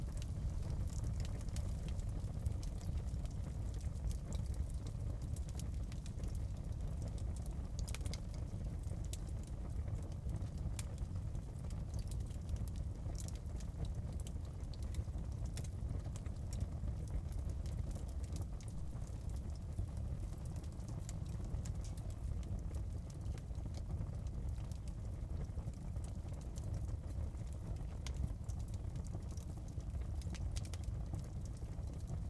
Fireplace burning wood.